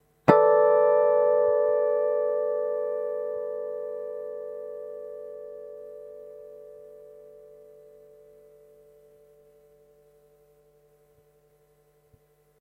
Finger plugged.
Gear used:
Washburn WR-150 Scalloped EMG-89 Bridge